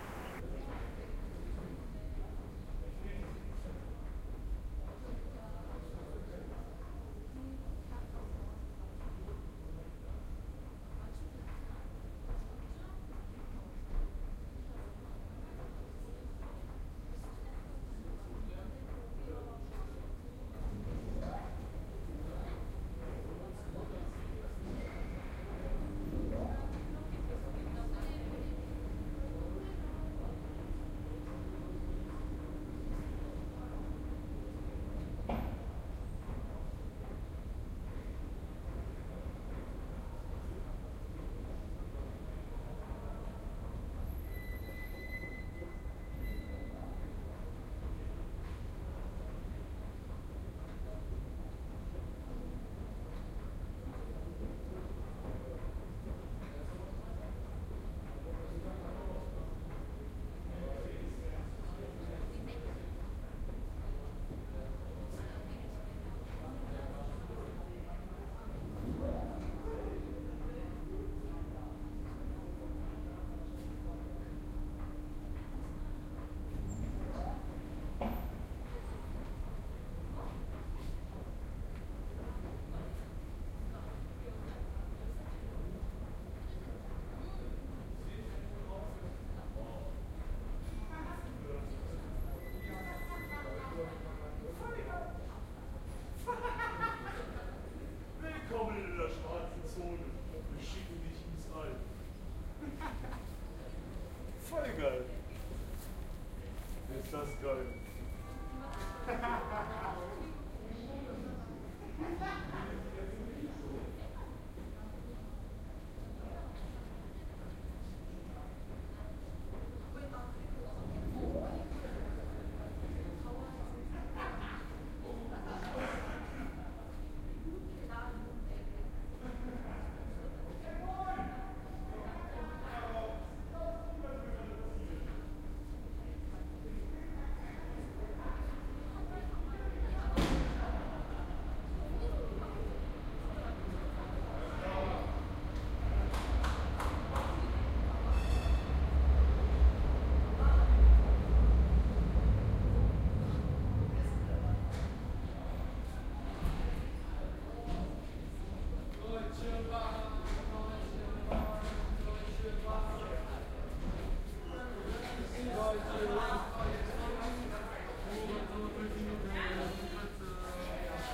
...down at the tubestation at midnight...and the usual drunk youngsters plus some spooky sound from the elevator.Soundman OKM Binaural microphones into Sharp MD-DR470H minidisc recorder.